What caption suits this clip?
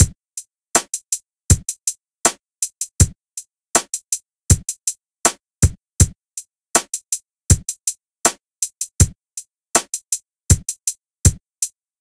SMG Loop Drum Kit 1 Mixed 80 BPM 0099
drumloop, kick-hat-snare